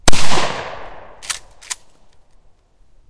Firing a Mossberg 500A in a woodland environment, 7 1/2 load.
Recored stereo with a TASCAM DR-07 MkII.
Here's a video.